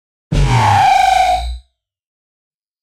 PUT ON THE BRAKES! Outer world sound effect produced using the excellent 'KtGranulator' vst effect by Koen of smartelectronix.
fx, horror, sci-fi, sound